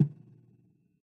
This comes from a drum synth function on an old mysterious electric organ. It also features the analog reverb enabled.
analog, cabinet, drums, reverb, speaker, synth